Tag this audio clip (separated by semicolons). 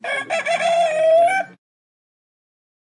Wales; Cockerel; Farm; Countryside; Outdoors; Birds; Chatter; Rooster; Ambience